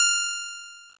Plucked
Guitar
Single-Note
Guitar, Plucked, Single-Note